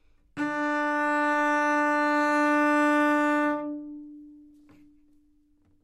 overall quality of single note - cello - D4
Part of the Good-sounds dataset of monophonic instrumental sounds.
instrument::cello
note::D
octave::4
midi note::50
good-sounds-id::1966
single-note, neumann-U87, good-sounds, D4, multisample, cello